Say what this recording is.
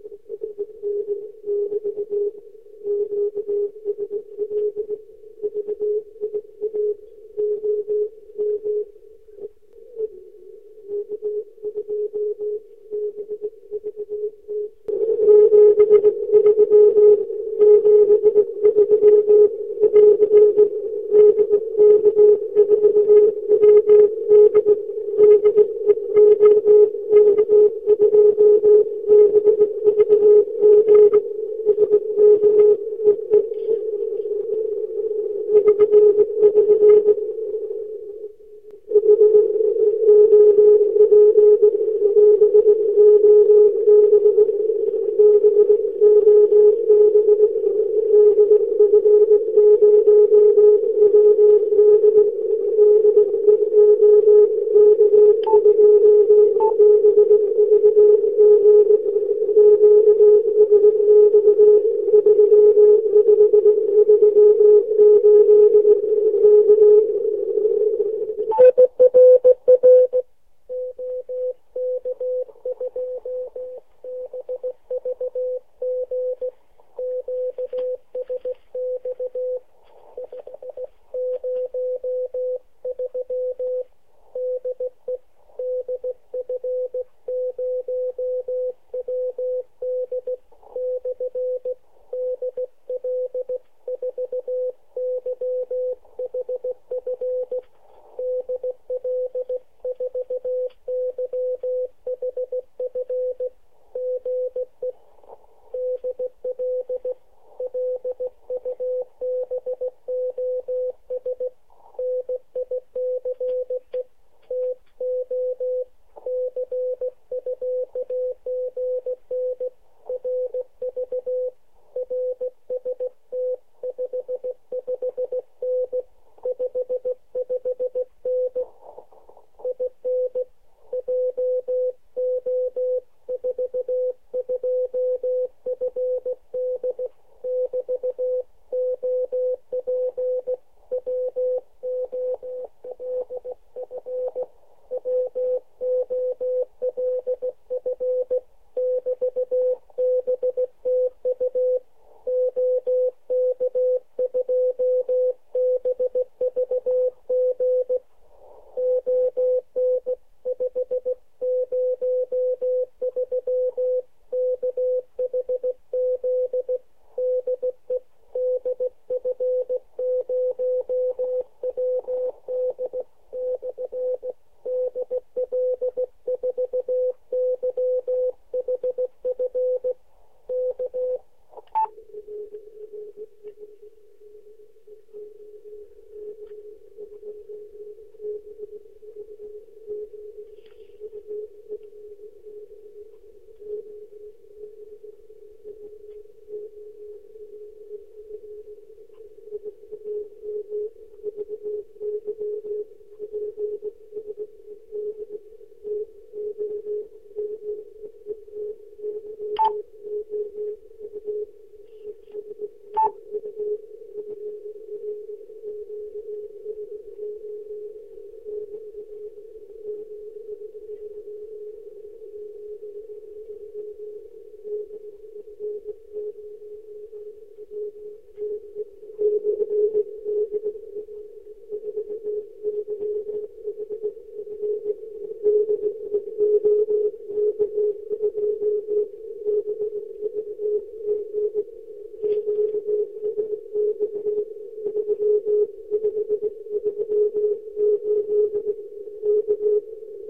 OK2BVG QSO
Part of special permitted radio traffic on days of the 100th anniversary of Titanic tragedy. Czech amateur radio station OK2BVG in contact on 502,4 kHz (frequency similar to Titanic MGY station used frequency) with German station sending on 3538 kHz. Received in JN79ND location.